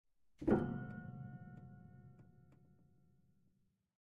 Sample Pedal 3
A sample of a pedal on an out-of-tune upright piano being hit really hard.